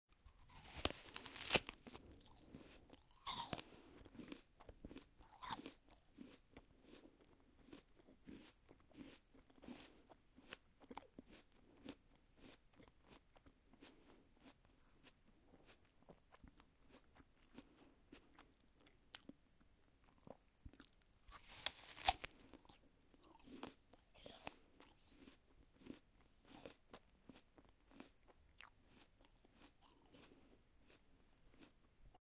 Apple Chewing
An apple being bitten and chewed. Recorded using Zoom H6 with XY capsule.
Apple, Chewing, OWI